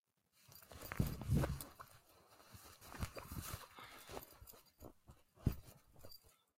Backpack Shuffling
A short backpack shuffle. To be used as a person picking up a backpack, or shoulder bag. Made using a small satchel moving it randomly. (Lol, apologies for the low grunt i made there. Way to ruin a sound...)
Bag, Pack, Satchel, On, Moving